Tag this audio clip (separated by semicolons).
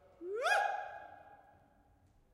live; recording; sampling; midi